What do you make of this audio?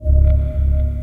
a short clip I picture being used (in a film) the moment that an innocent person becomes aware that an 'evil' presence might be near them, and as the sound plays their eyes shoot hard left and the heart races
horror, creepy, scary, phantom, haunting, spooky, suspense, ghost, evil